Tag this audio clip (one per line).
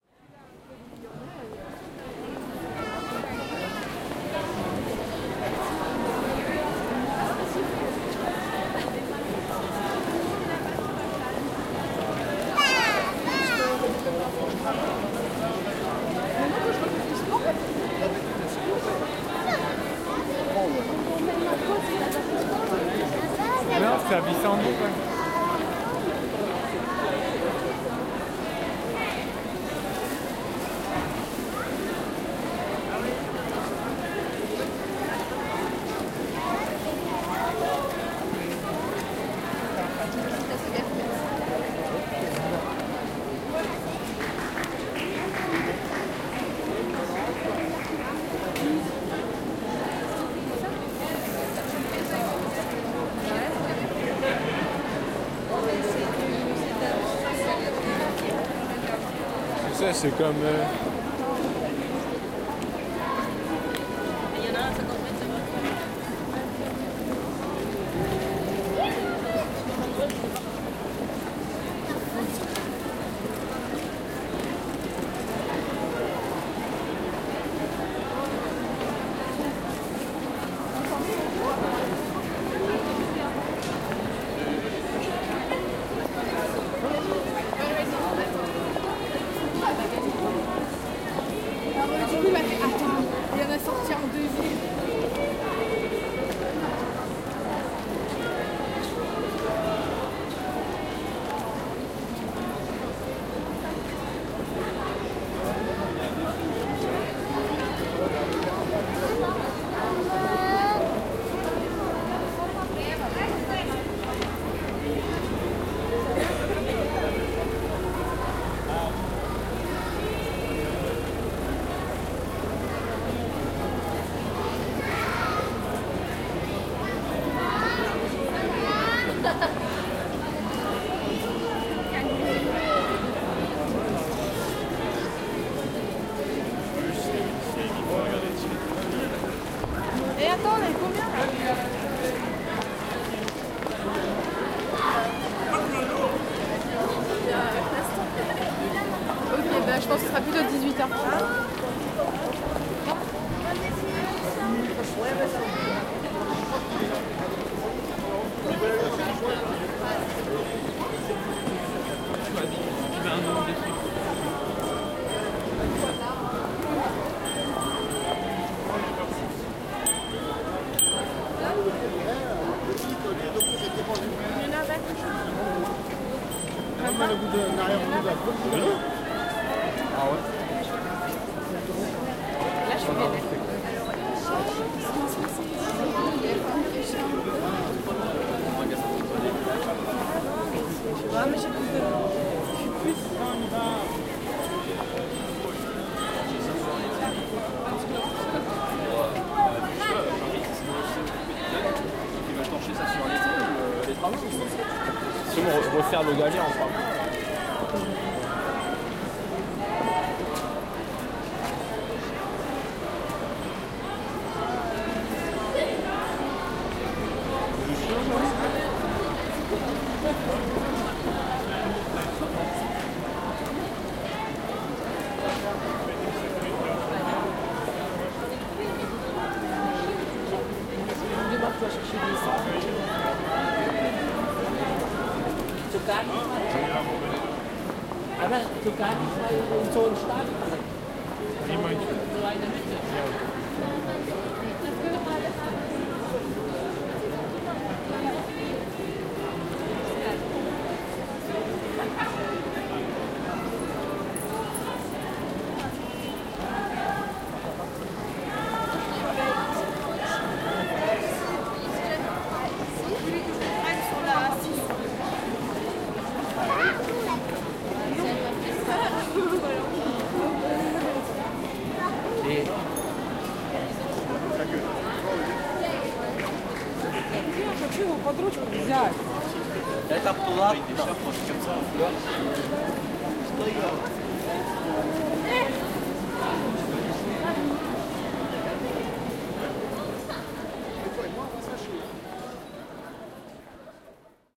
christmas-market
marche-de-noel
Alsace
ambiance
people
talking
street-ambience
languages
field-recording
market
crowd
Christkindelsmarik
Strasbourg
city